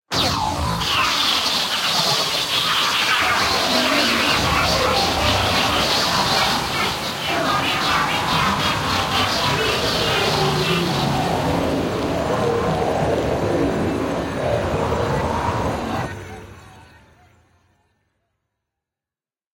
Voices Inside My Dead
Strange nightmarish voices
Original, Sci-Fi, Strange, Unusual, Voices